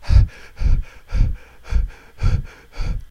Heavy Breathing 2
Recorded with an SM58 and MOTU 828 MK2 for an indie horror game
breathing, heavy-breathing, scared